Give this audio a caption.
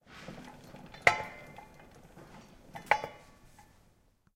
Sound of periodicals machinery in library.
Recorded at the comunication campus of the UPF, Barcelona, Spain; in library's basement, in periodicals room.
campus-upf, perdiodical